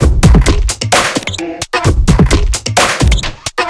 Hiphop/beats made with flstudio12/reaktor/omnisphere2